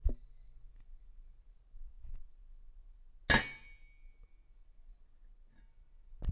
Knocking a kettle
kettle, Knocking, recording